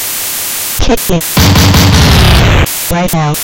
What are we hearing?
female voice saying "Kick me" then a roll of kicks, then the same voice saying "right now."